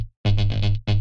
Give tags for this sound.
bass; club; compressed; dance; distorted; dub-step; effect; electro; electronic; fx; house; loop; rave; synth; techno; trance

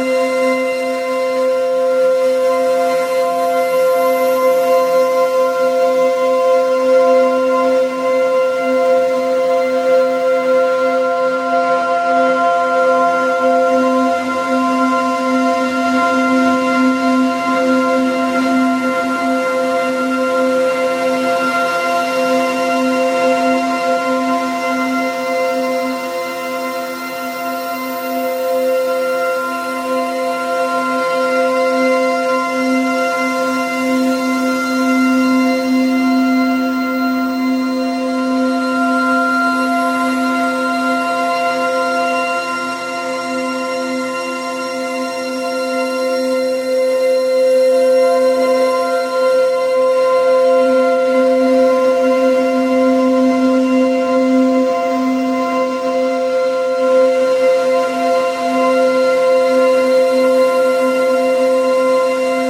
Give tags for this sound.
loop; pad; ambient; drone; saturated; electronic; processed; generative; atmosphere

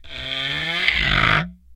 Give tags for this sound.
daxophone; friction; idiophone; instrument; wood

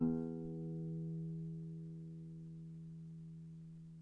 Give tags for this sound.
acoustic; clean

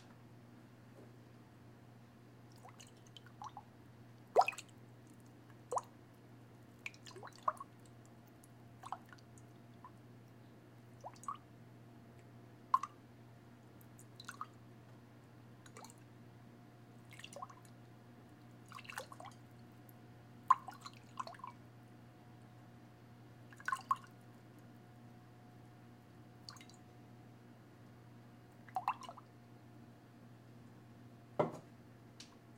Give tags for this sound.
slosh; potion; flask; glass; water; jar

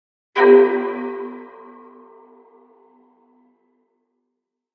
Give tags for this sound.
fear; suspense; metallic; percussion; gamesound; hit; horror

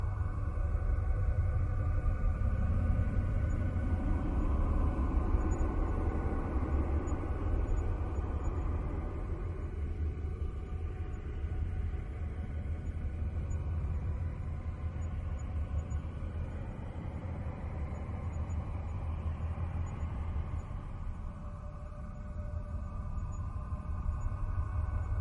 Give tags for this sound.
galaxy; loop; sounds

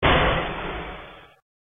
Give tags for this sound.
Dynamical-Systems; Histogram-Mapping-Synthesis; algorithmic; FFT-Convolution-Filtering; electronic; explosion; synth; sound-effects; Cellular-Automata

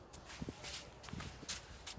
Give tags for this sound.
beach; sand

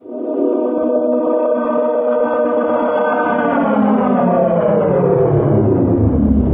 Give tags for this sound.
child; human; processed; stereo; voice

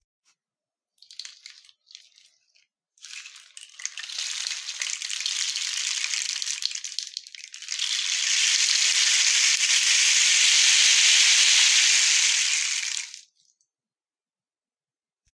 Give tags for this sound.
device; smartphone; recording; format; rainstick; Indoor-recording; instrument; LG; handheld

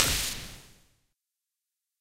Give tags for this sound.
drum; experimental; hits; idm; kit; noise; samples; sounds; techno

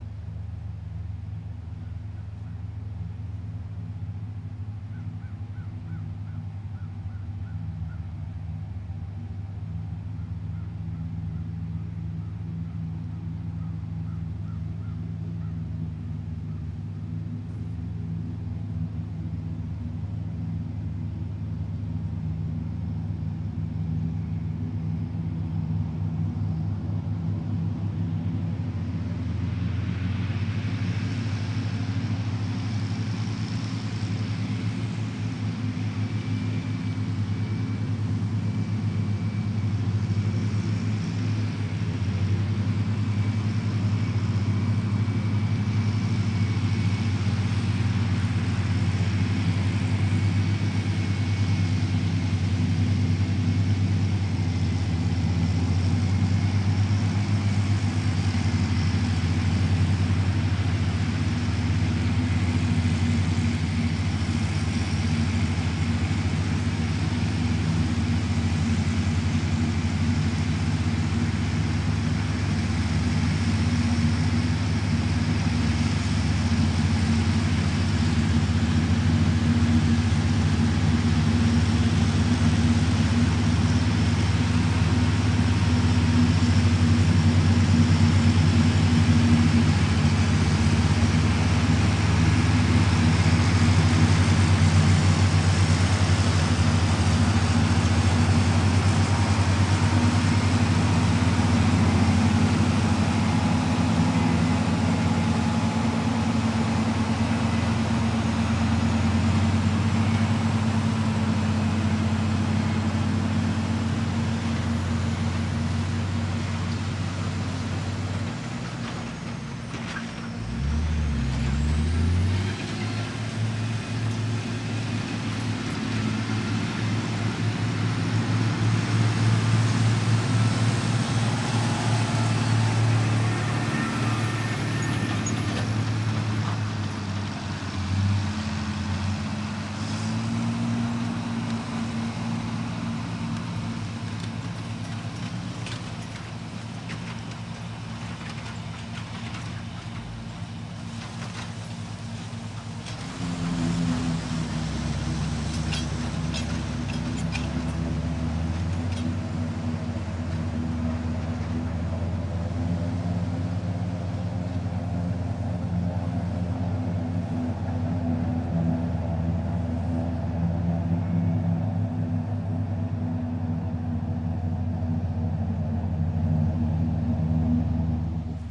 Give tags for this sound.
farm; tractor; tractor-sounds

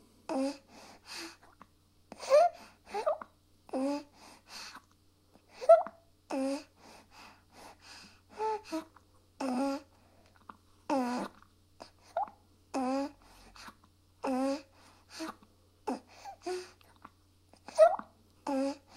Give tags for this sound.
cz; czech; drinking; panska

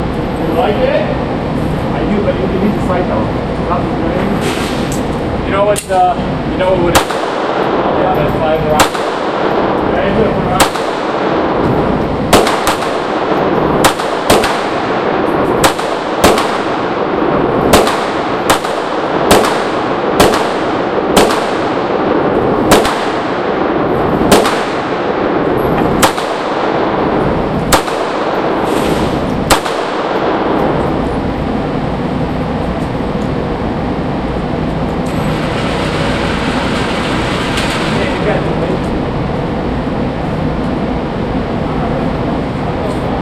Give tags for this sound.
22; 9; caliber; facility; gun; indoor; millimeter; nine; range; shots; twenty-two

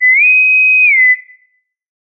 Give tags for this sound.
alert; attention; ringtone; sci-fi; star-trek; sttos; whistle

